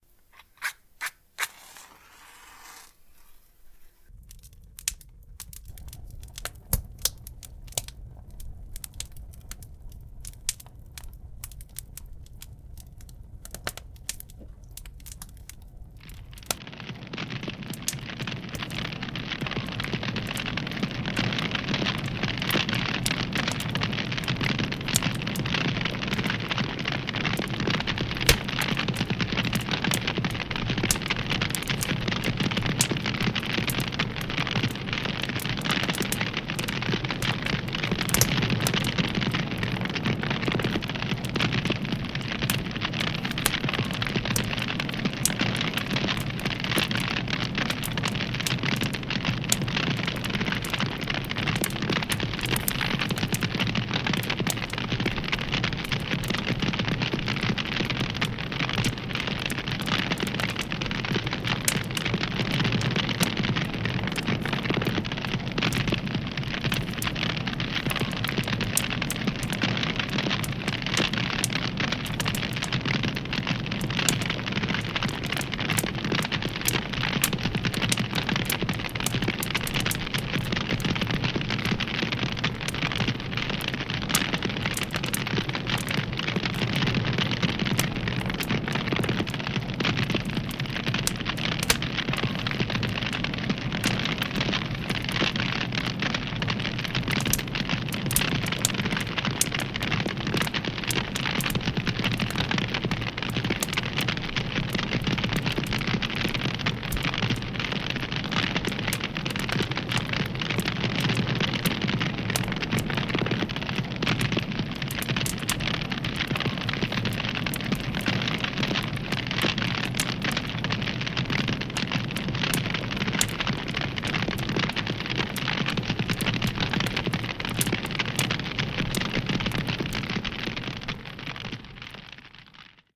like the first version but slower enflaming of the bigger fire and with filter
flames, burning, fire, camp, filter